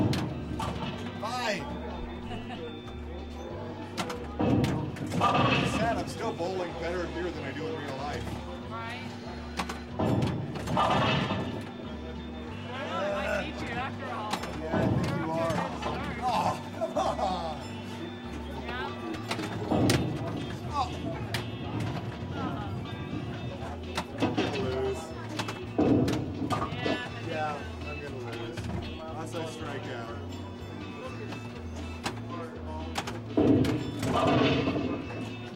Pinball Hall Of Fame 1
Sounds from the Pinball Hall Of Fame in LAs Vegas.
game, pinball